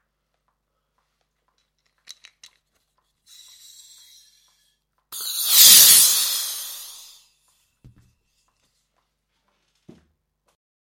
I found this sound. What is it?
Laughing gas/nitrous oxide/nos balloon inflation audio sample #06
Inflation of nos balloon recorded on wide diaphragm condenser, with acoustic dampening around the mic but not in studio conditions - should be pretty cool for a non synthy noise sweep, or for a snare layer